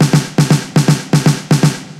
Just a drum loop :) (created with flstudio mobile)